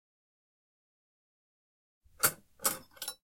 grab a knife
Grabbing a knife. Recorded by TASCAM DR-40.
CZ, dishes, Czech, Pansk, knife, metal, Panska